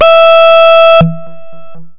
Overdrive pulse wave E5
This sample is part of the "Overdrive pulse wave" sample pack. It is a
multisample to import into your favorite sampler. It is a pulse
waveform with quite some overdrive and a little delay on it..In the
sample pack there are 16 samples evenly spread across 5 octaves (C1
till C6). The note in the sample name (C, E or G#) does indicate the
pitch of the sound. The sound was created with a Theremin emulation
ensemble from the user library of Reaktor. After that normalizing and fades were applied within Cubase SX.